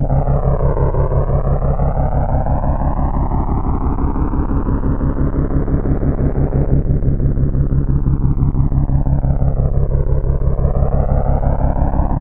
MOD TRUCK LONG D
Korg Polsix with a bad chip
bass, d, modulating, droning, reso